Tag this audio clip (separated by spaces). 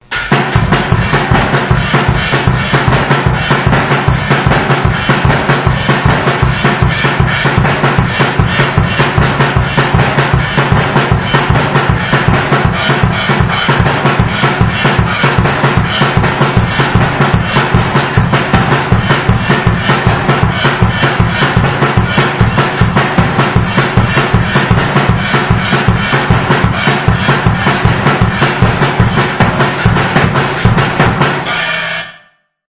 loop drums cubase dance machine beat